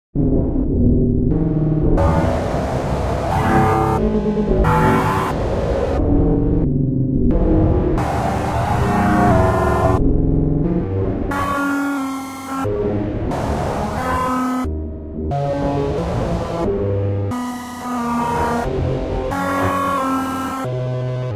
Vietnam Robot Flashback
voice, machine, rough, ghost, alien